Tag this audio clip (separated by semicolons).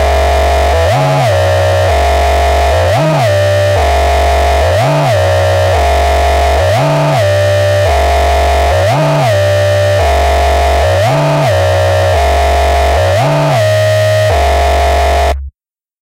electronic; bleep; tweaking; korg; monotron-duo; beep; smartelectronix; mda-tracker